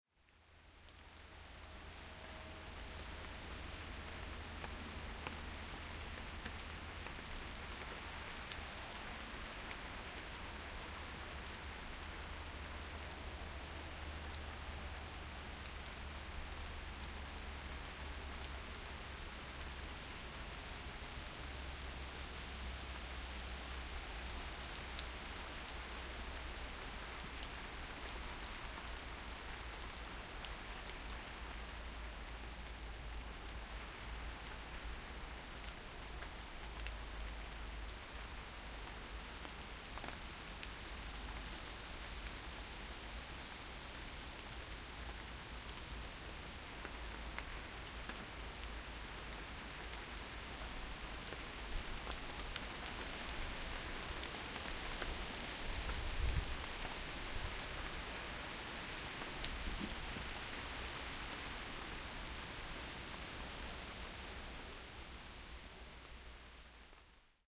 Short recording made while coming back home really early in the morning. I was caught by a summer storm and had to stop under a big tree to keep myself away from rain. You can hear raindrops falling on the leaves and on the paving. M-Audio Microtrack with its own mic.
light-rain, ambience, rain, field-recordint